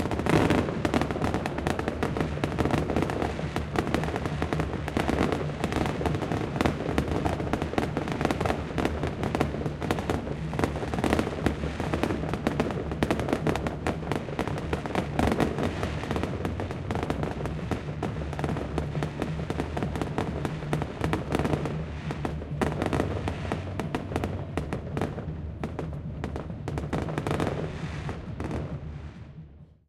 Son de feux d’artifices. Son enregistré avec un ZOOM H4N Pro et une bonnette Rycote Mini Wind Screen.
Sound of fireworks. Sound recorded with a ZOOM H4N Pro and a Rycote Mini Wind Screen.
boom, fire, fireworks, rocket